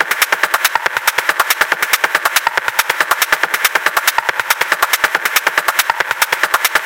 TECHNO LOOPY VERB N COMPRES
mash it up techno loop just compress stuff play with fx